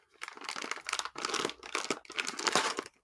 open a pack
opening pack chips
chips, opening, pack